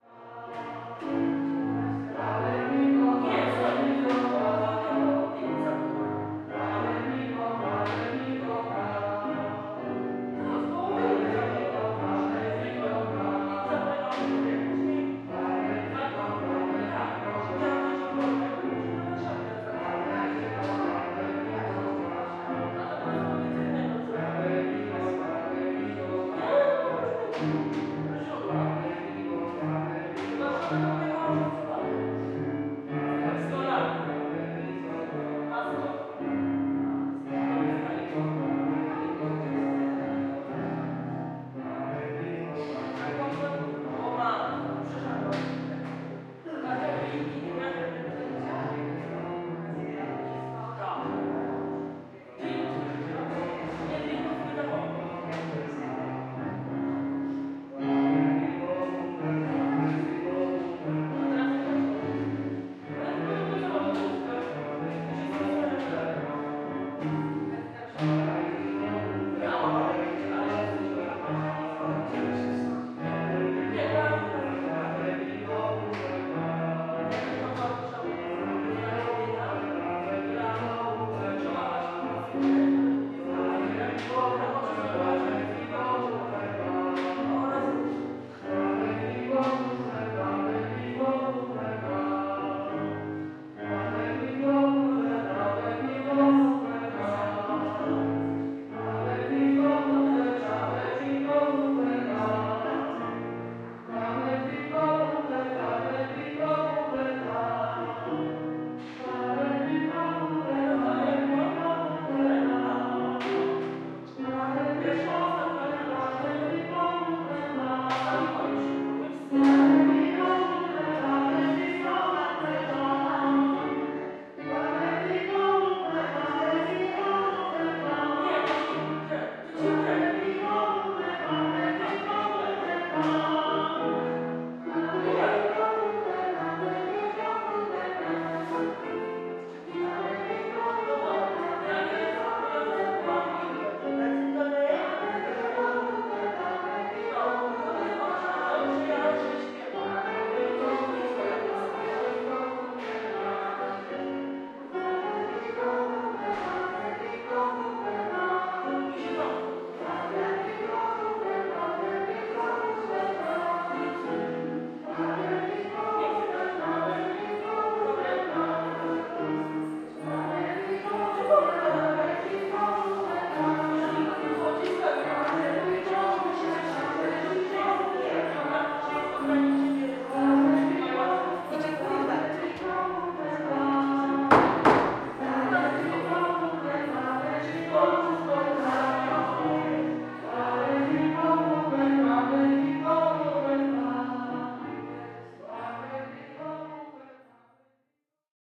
Łódzki Dom Kultury próba chóru 20.09.2018
20.09.2019: corridor in Łódźki Dom Kultury (Cultural Center in Łódź). The rehearsal of the local vocal band.
Cultural-Center, Poland, Polish, singing, song